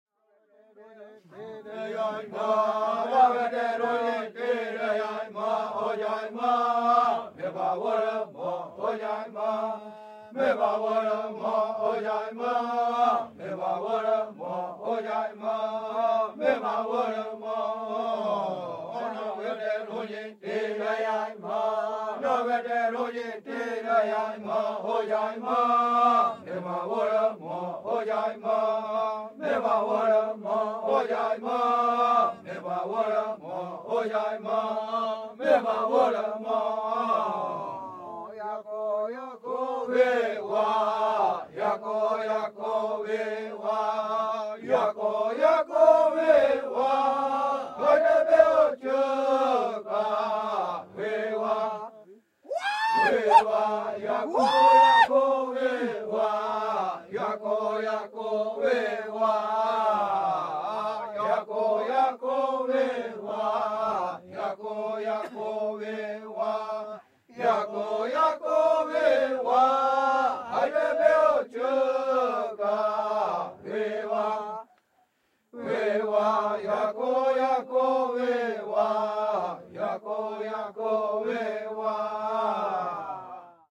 Song number 5 from the "Kayapo Chants". Group of male Kayapó native brazilian indians singing "the warrior chant", in "Las Casas" tribe, in the Brazilian Amazon. Recorded with Sound Devices 788, two Sennheiser MKH416 in "AB" and one Sennheiser MKH60 in center. Mixed in stereo.
amazon, brasil, brazil, caiapo, chant, field-recording, indian, indio, kayapo, male-voices, music, native-indian, rainforest, ritual, tribal, tribe, tribo, voice, warrior